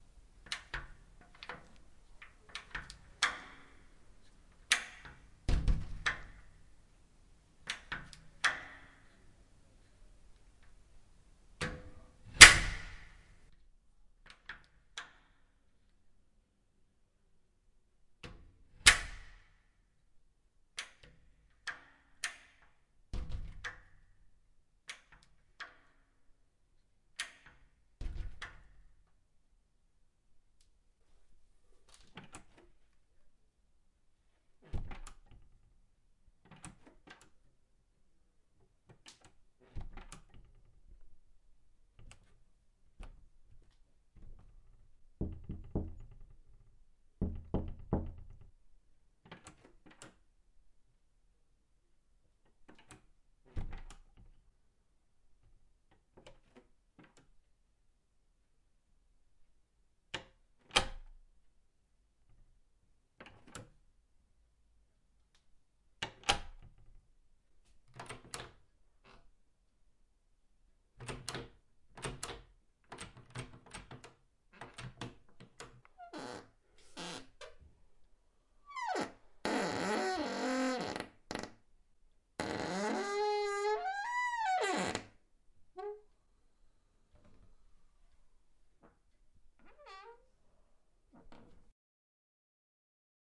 door open closing squeaking knocking different types
a few doors opened and closed
close, closing, creak, door, knocking, open, opening, squeaky, wooden